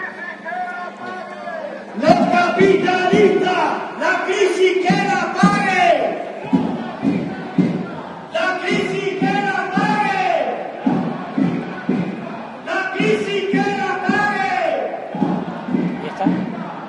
people shouting slogans against the government during a demonstration. Recorded in Seville on March 29th 2012, a day of general strike in Spain. Soundman OKM mic capsules into PCM M10 recorder